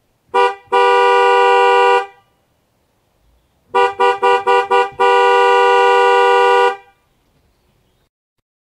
Car Horn Irritated driver stuck in traffic
Car horn honking like a guy stuck in traffic (which I know all too well). two versions of irritation.
Recorded using a Audio Technica AT891R with a Scarlett Solo preamp then cleaned up using Izotope RX8 advanced to remove some ambient noise.
You may use as much as you want for whatever you want.
Hope it helps!
beep, car, cars, fi, field-recording, honk, honking, horn, traffic